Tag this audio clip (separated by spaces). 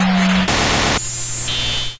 crackle interference noise radio snow sound-design static tv white-noise